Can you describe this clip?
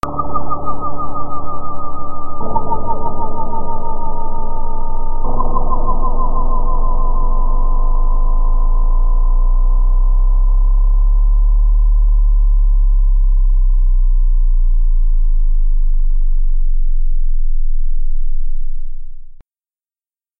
Scene 4 Take 1
It'sa very-a Nice-a. Background FX.